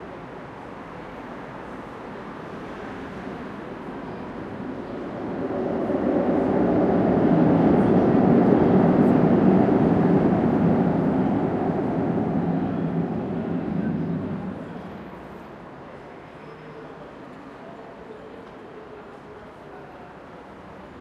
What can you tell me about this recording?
Tram going over a bridge (recorded from below)
bridge, city, field-recording, noise, street, traffic, train, tram